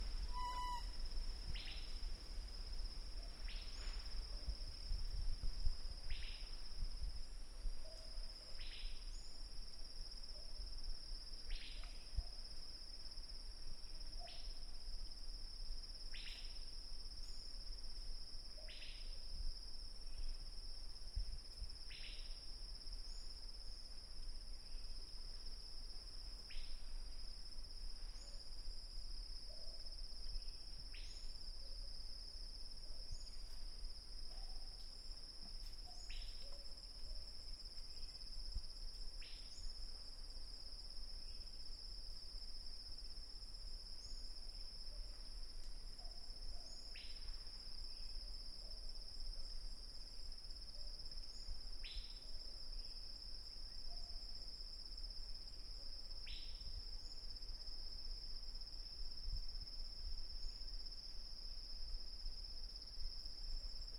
Chirping Bird
Jungle, East, Cambodia, Birds, South, Asia, Nature